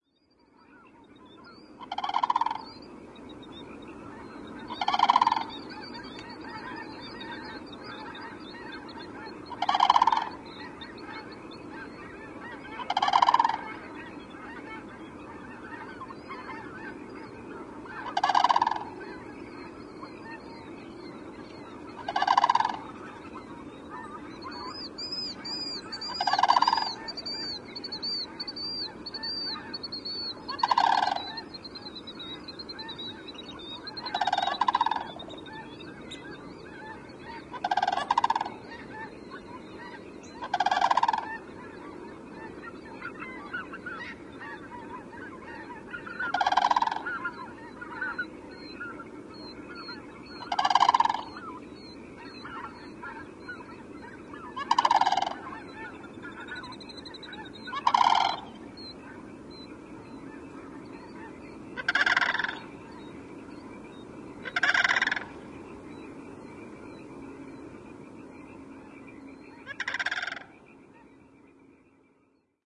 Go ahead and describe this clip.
ag21jan2011t42
Recorded January 21st, 2011, just after sunset.